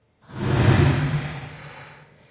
A rumbly noise I originally used for a submarine sound effect.